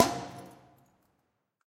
Recordings of different percussive sounds from abandoned small wave power plant. Tascam DR-100.
ambient, drum, field-recording, fx, hit, industrial, metal, percussion